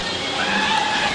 newjersey OC screamloop
Loopable snippets of boardwalk and various other Ocean City noises.
field-recording, loop, ocean-city